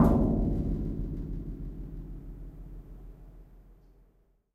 Lift Percussion 2
Metallic lift in Madrid. Rough samples
The specific character of the sound is described in the title itself.
rough-sample, lift, percussion, metallic, wooden, sound-design, knock